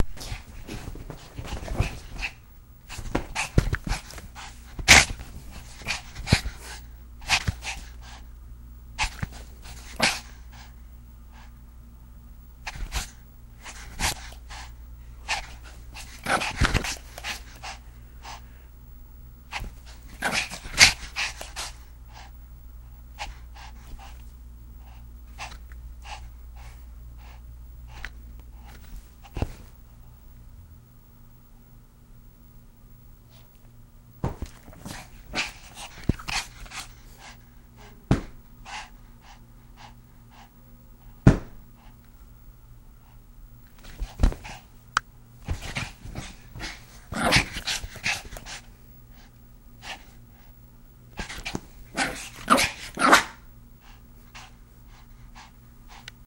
SonyECMDS70PWS shaggy
digital, microphone, electet, test, dog